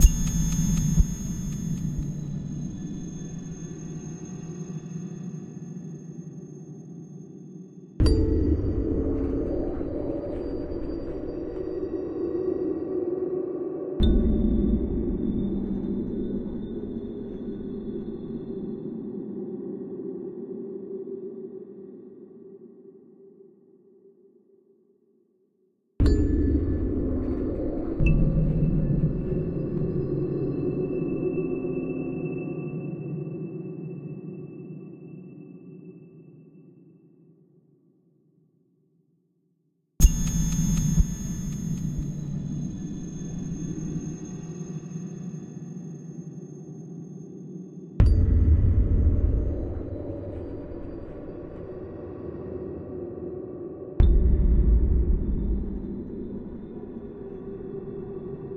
Old temple - atmo drone thriller